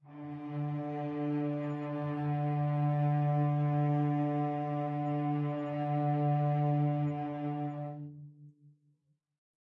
One-shot from Versilian Studios Chamber Orchestra 2: Community Edition sampling project.
Instrument family: Strings
Instrument: Cello Section
Articulation: vibrato sustain
Note: D3
Midi note: 50
Midi velocity (center): 63
Microphone: 2x Rode NT1-A spaced pair, 1 Royer R-101.
Performer: Cristobal Cruz-Garcia, Addy Harris, Parker Ousley
cello-section, strings, vibrato-sustain, single-note, multisample, cello, vsco-2, d3, midi-velocity-63, midi-note-50